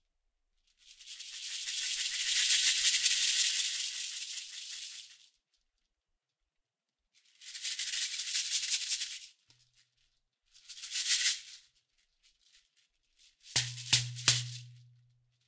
Jerusalema 124 bpm - Shekere - misc sounds
This is part of a set of drums and percussion recordings and loops.
Shekere misc sounds and effects.
I felt like making my own recording of the drums on the song Jerusalema by Master KG.
fx, jerusalema, percussion, shekere, sounds